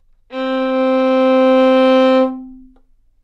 Part of the Good-sounds dataset of monophonic instrumental sounds.
instrument::violin
note::C
octave::4
midi note::48
good-sounds-id::3609
neumann-U87, C4, violin, multisample, single-note, good-sounds